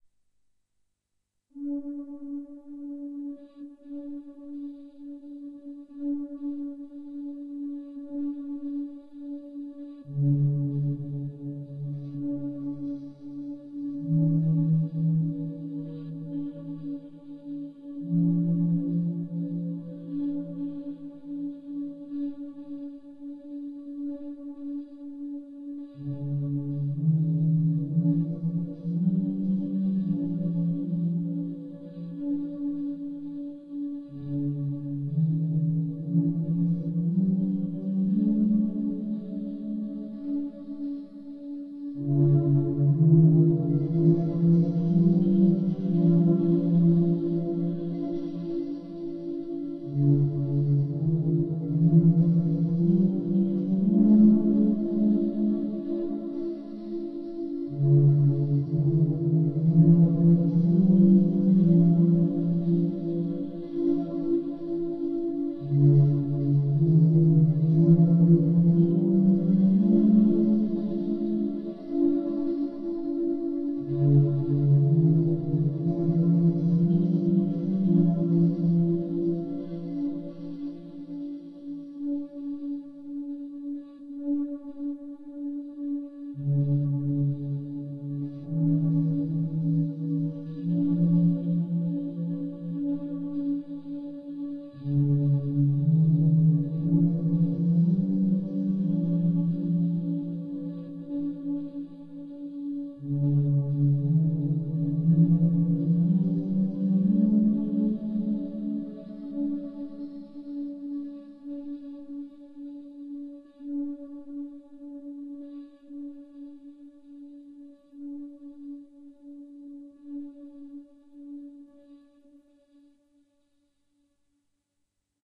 a piece of soft music. Can be used for various purposes. Created with a synthesizer and recorded with magiX studio.
Like it?